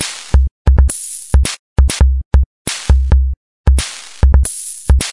These parts were from Premonition which was on the Directors Cut LP back in 2003.
electronic, gltich, FX, processed